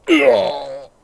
Male Death 04
Recorded by mouth
scream, dying, man, voice, human, vocal